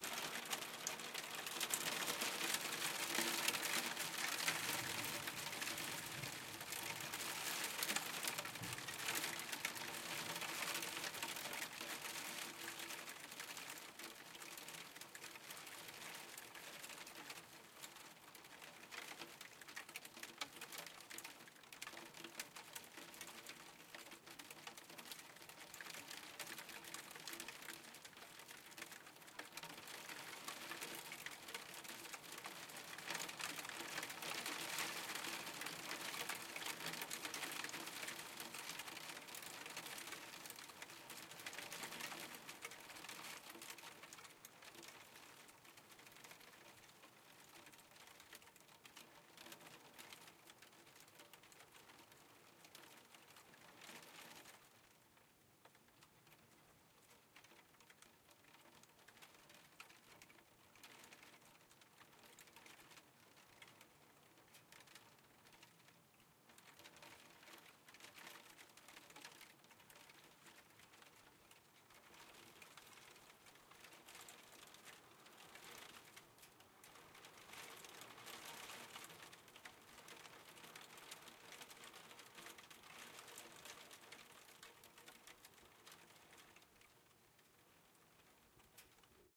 Hail window interior
Hail on window interior
snow, ambience, interior, Hail, field-recording, window